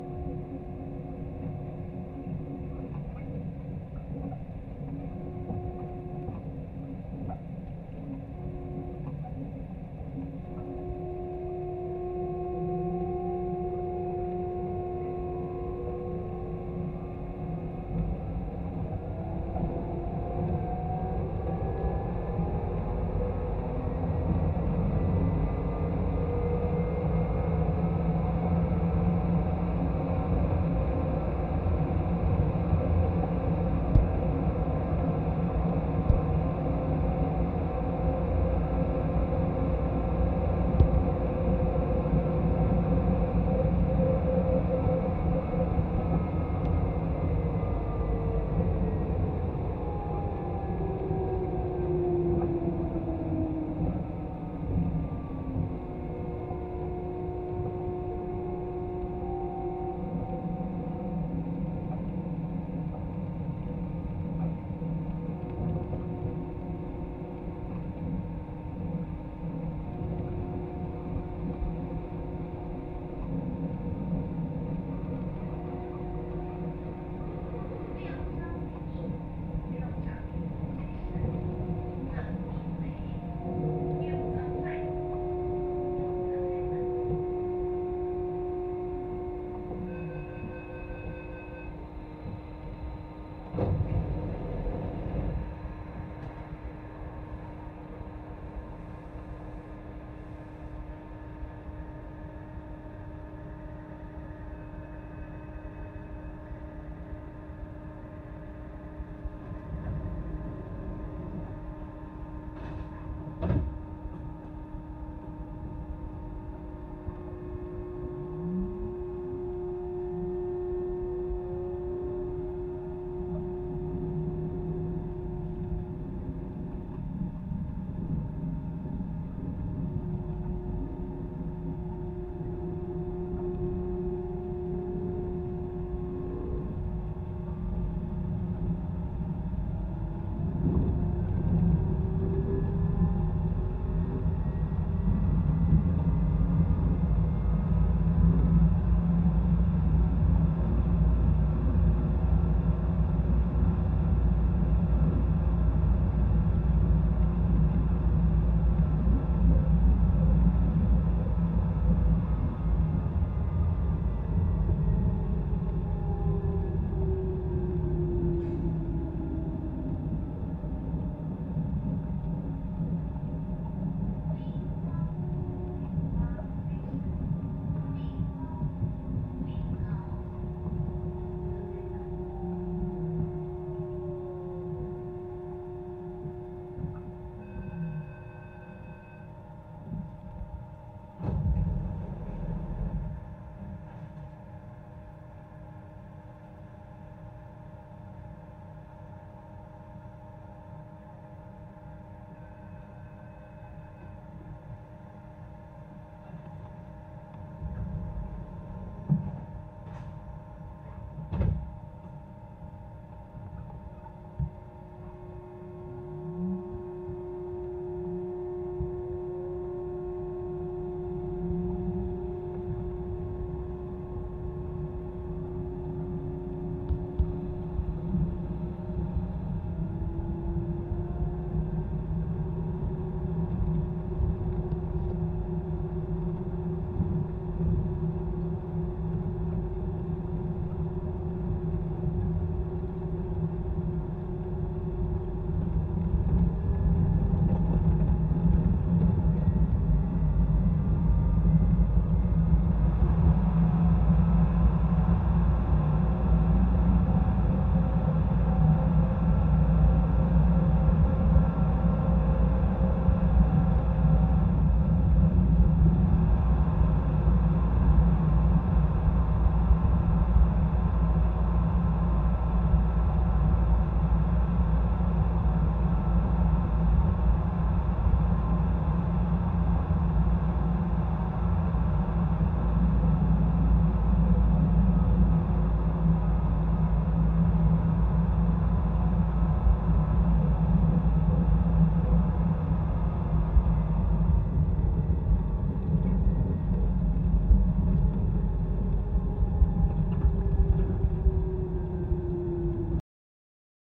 2022-05-15-mrt-contact-mono-003
Taipei metro recorded with JrF contact microphone.
electric, rumble, train, rail, vibration